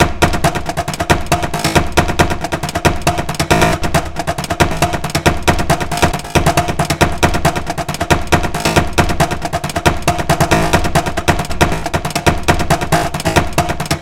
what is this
StaplerAmen160bpm lol(by yewbic).REMIX 01
REMIX : StaplerAmen160bpm_lol by yewbic with VST SLICEX + reverb FL studio
beat, loop